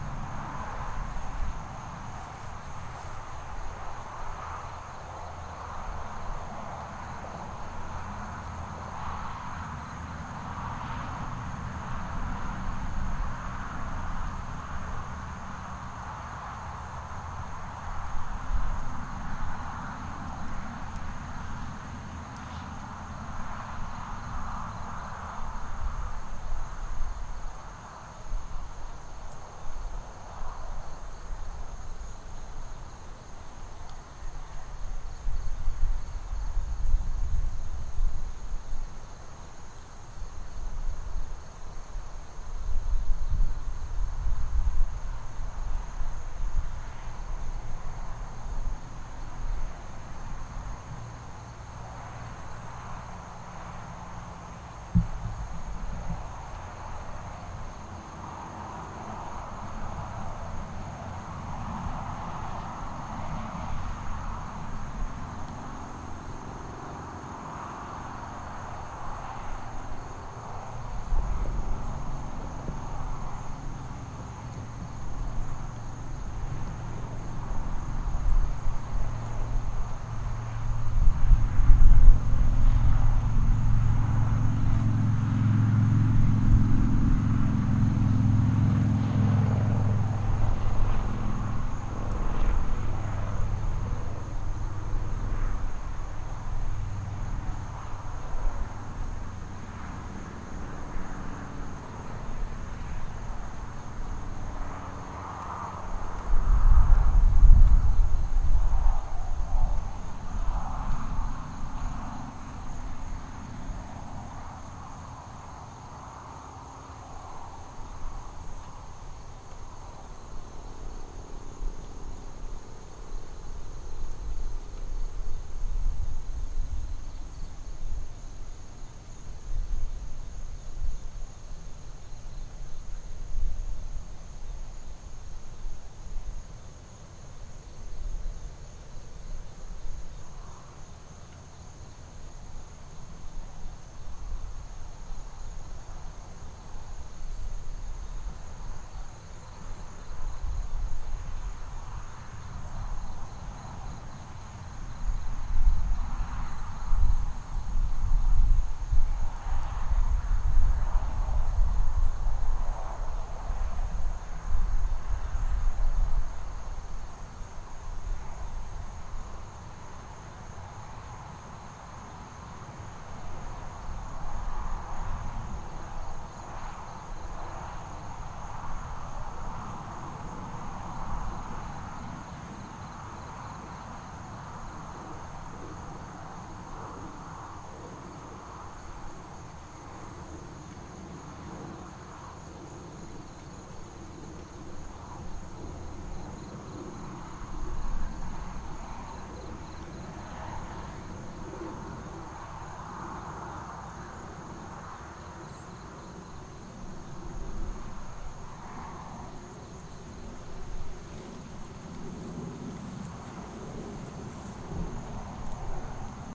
silentnight2frogonmic
More ambiance recorded with laptop and USB microphone. I set the microphone on top of a PVC tube sticking out of the ground on an empty lot in a mobile home park in Vero Beach to record the critters and passing traffic and walked away for a while. When I returned I grabbed the microphone and walked a few hundred feet back to a lit area and realized a large slimy tree frog had grabbed on to the microphone at sometime during the recording. I cannot determine what sounds if any were made by that frog but a full investigation is underway.
ambience, animals, atmosphere, field-recording, night